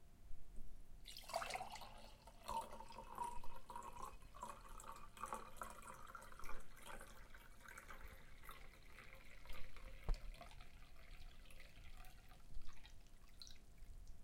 Long liquid pour. Distant, low pitch.